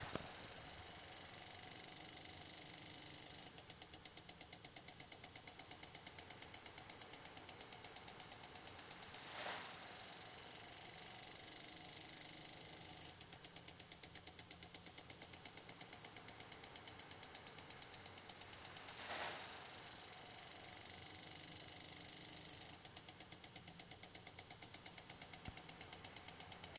Lawn Sprinkler. Recorded with a Zoom H2 audio recorder.
Background, field, grass, landscaping, lawn, Sprinkler, water